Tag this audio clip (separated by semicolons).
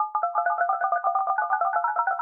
effect; phone